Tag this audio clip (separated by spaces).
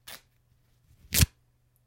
flesh rip tear